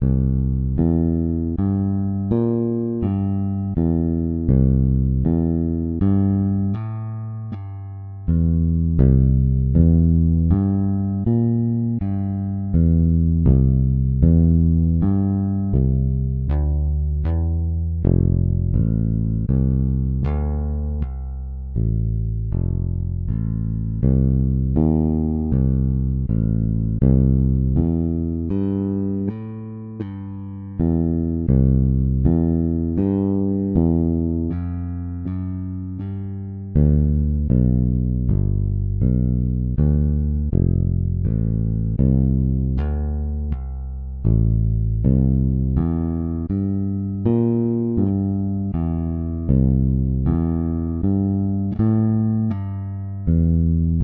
Song6 BASS Do 3:4 80bpms
Do, blues, loop, Chord, Bass, beat, 80, bpm, HearHear, rythm